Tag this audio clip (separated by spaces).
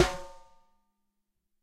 Drum Ludwig Rim Shot Snare